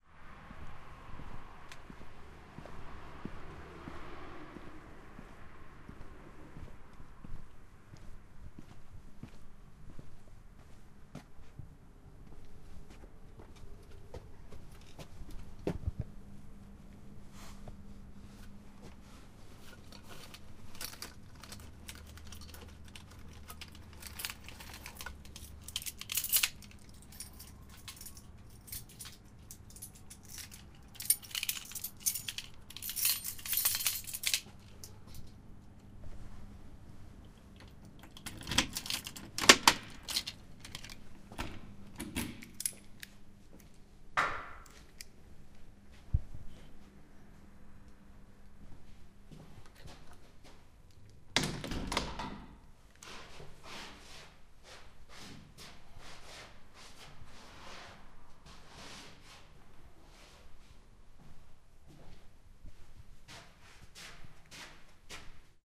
Haus betreten
I walk up to my house, open the door and walk in.
door, house, keys, opening